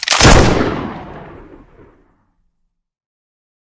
LAPD 2049 PKD Blaster (Blade Runner)
This is my attempt at the Iconic Blade Runner Pistol. I did not used the original sound as a source because I wanted a more updated feel. I hope you enjoy.
Firearm, Pistol, PKD, Shoot